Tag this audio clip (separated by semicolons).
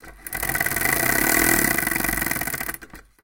cuckoo-clock; windup